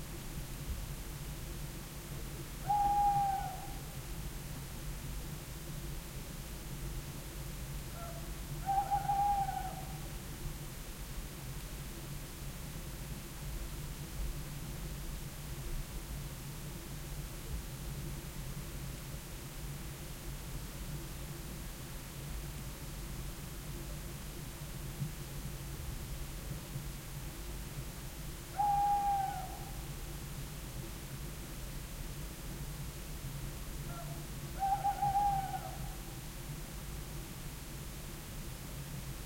I wonder, if it was the same owl, that I recorded in the autumn, this time using the Soundman OKM II with the A 3 adapter and a Sony TCD-D8
DAT recorder. The noise in the background is from the wind in the
forest, a small burn (stream) and only a little from the equipment.